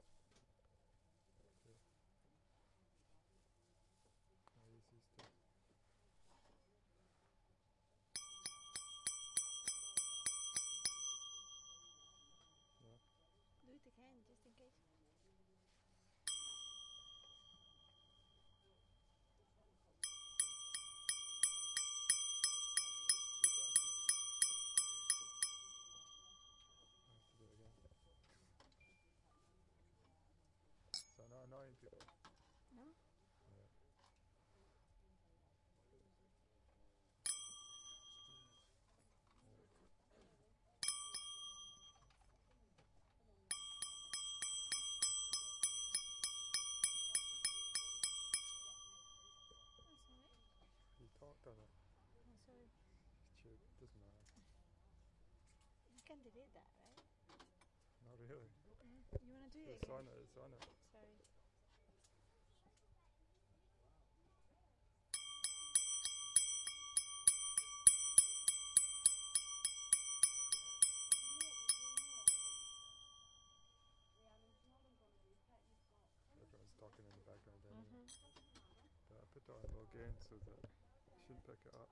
Foley Bells Charity
some brass cups being knocked together in a charity shop
bells, metal, foley